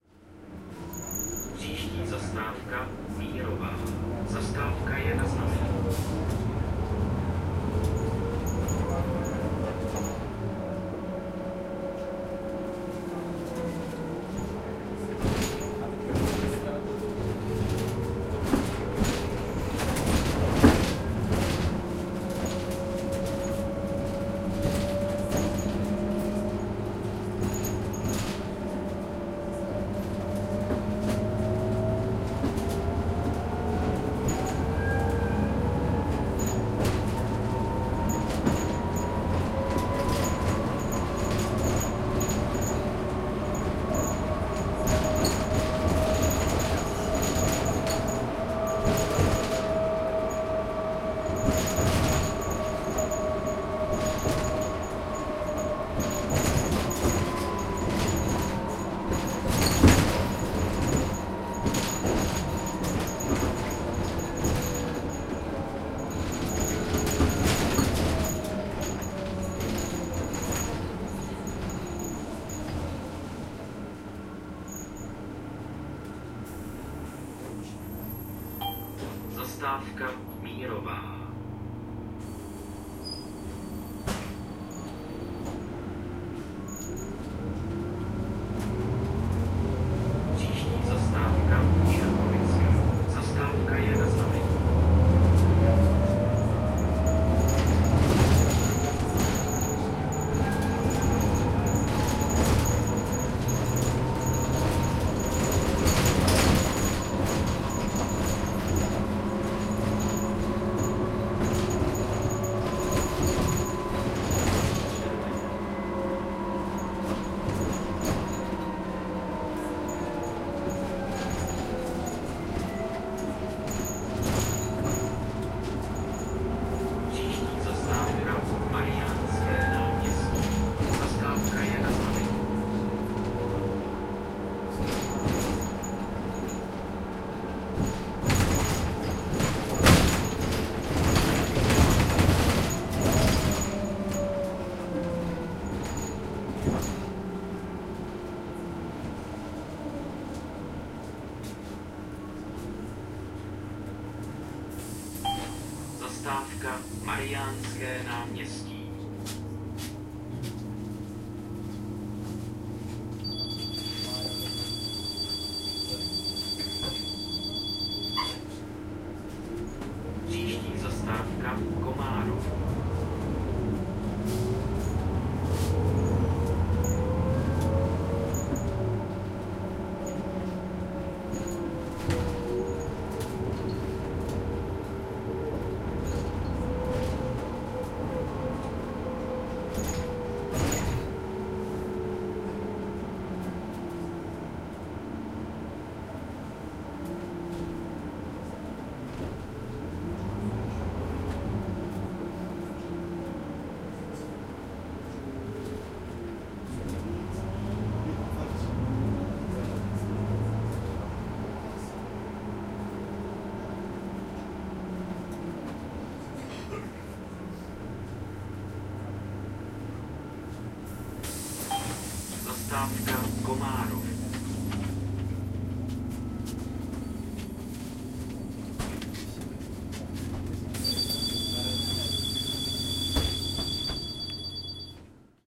Shaking bus no. 49
A very shaky bus and quite scary ride back home. The sound contains a super-hard shaking internal fittings of the bus, as well as people's chatter, bus stops announcing, door opening-closing etc.
Recorded in hand, no further editing.
noise, transportation, shaking, industrial, engine, ambient, city, trembling, scary, brno, whooshing, bus, interior, vehicle, traffic, ambience, travelin, field-recording